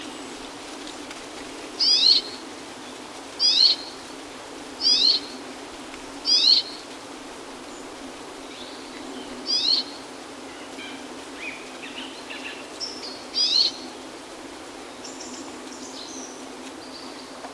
rock sparrow
A mono recording of Petronia petronia. Ariège, France.
field-recording, bird, rock-sparrow, mono, petronia